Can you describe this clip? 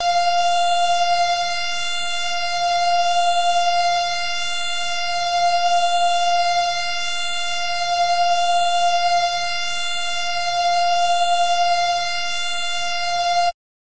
Cool Square F5
F5 (Created in AudioSauna)
analog, square, synth, synthesizer